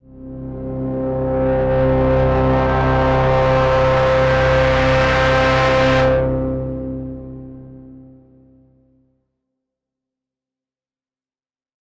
Riser neutral
short riser made with vst & plugin, check the pack for other mood
fx, pad, rise, riser, soundeffect, synth, texture